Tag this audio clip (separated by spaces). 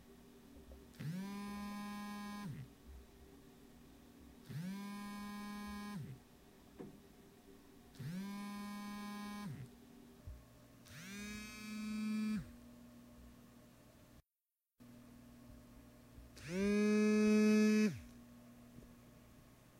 phone vibrate